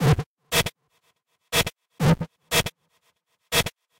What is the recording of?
MOV.beat 4
Computer beat Logic
noise, electronic